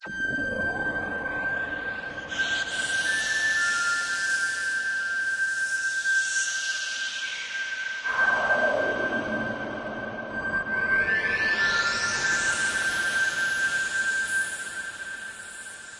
haunting lonely trill with space effects. 4/4. 120bpm. 8 bars in length.
effects, fx, haunting, lonely, orion, space, synth, trill